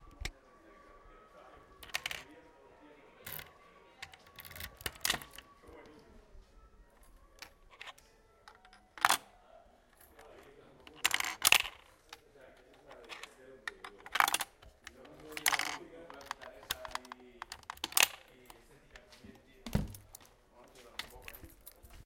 The sound of the locker of ESMUC
acces, ESMUC, locker, user